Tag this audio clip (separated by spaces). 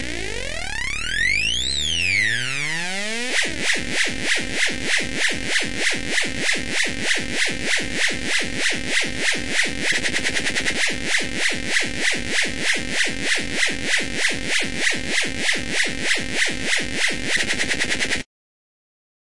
loud pitch